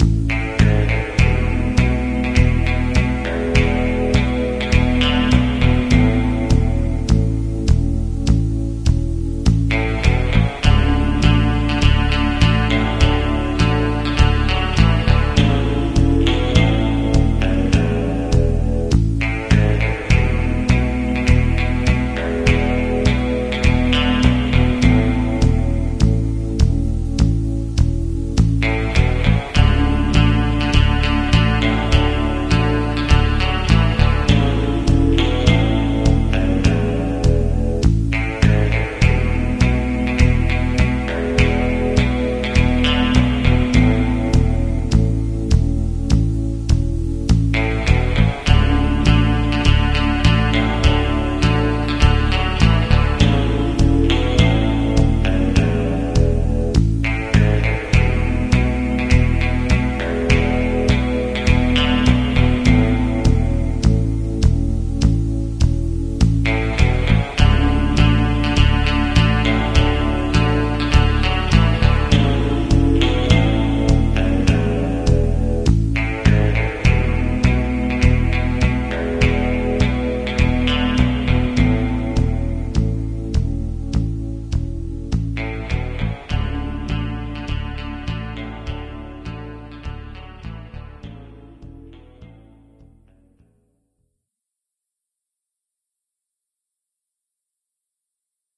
Country Music
Here is the first out of a series of music loops I am uploading. Hope you enjoy. This is an 8 bar loop of music looped 4 times in Audacity. Enjoy.
Music, Country, Loops